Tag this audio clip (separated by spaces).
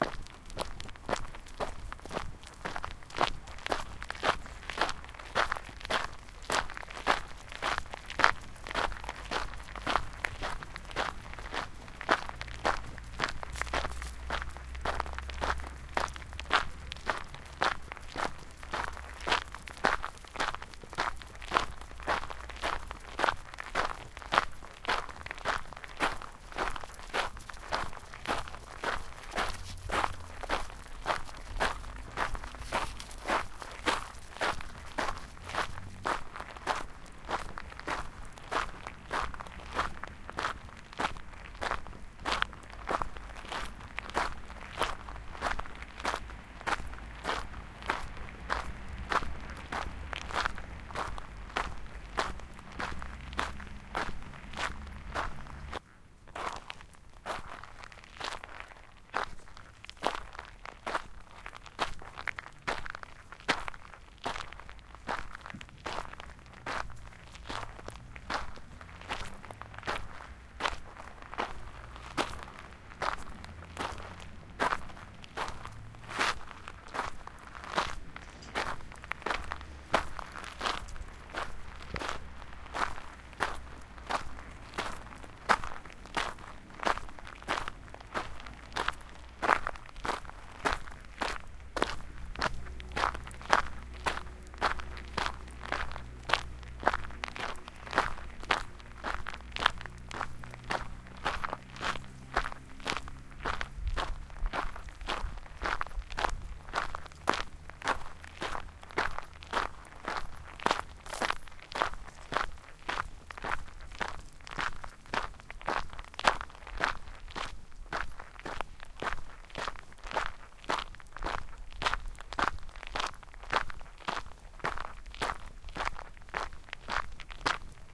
floor footsteps gravel walk